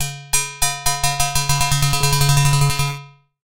A metallic and resonating ball bouncing.